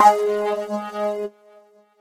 Dirty wave G#3
This sample is part of the "K5005 multisample 01 Sawscape" sample pack.
It is a multisample to import into your favorite sampler. It is a lead
sound that is a little overdriven suitable for your solos. In the
sample pack there are 16 samples evenly spread across 5 octaves (C1
till C6). The note in the sample name (C, E or G#) does indicate the
pitch of the sound. The sound was created with the K5005 ensemble from
the user library of Reaktor. After that normalizing and fades were applied within Cubase SX.
lead, multisample, overdriven, reaktor